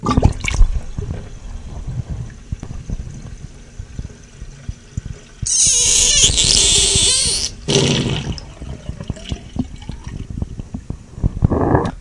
Bathroom Sink Drain
Stereo recording of me pulling the stopper from the drain, 4 seconds of the sound being drained away, when a whirlpool vortex shows up for about a second (which is very squeally and high-pitched), then followed right after by a loud, angry gurgling sound, then it ends with a calm, lathering sound of the last little bit of water being sucked in the drain.
P.S. Sorry about the quality, I tried to make it the best as possible. If you want, you can send me a better quality version if you want to help me edit it, as my editing skills are not so good.
gurgly, loud, sink, bathroom, high, pitched, squeal, drain